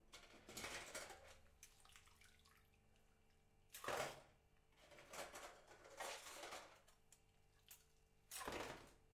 FOODTware dropping cutlery into water TAS H6
Recorded with a Zoom H6 and Stereo capsule. The sound of cutlery being dropped in to soapy water and being picked back up.
cutlery, fork, impact, knife, metal, owi, water